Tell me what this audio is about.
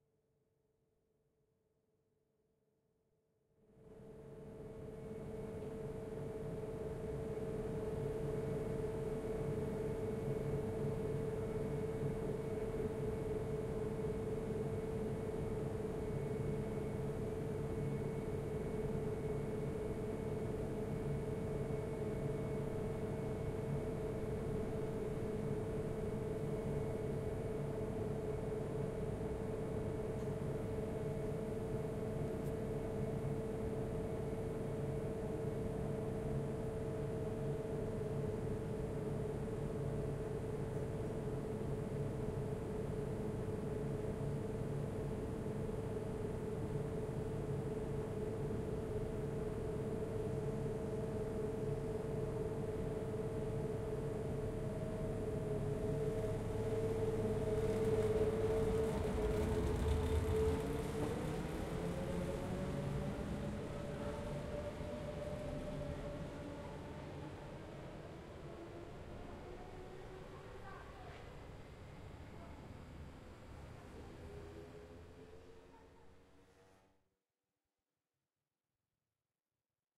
Swiss federal train departing station.
Recorded with Zoom H4N in 2014 in an almost empty train station in Sierre, Switzerland.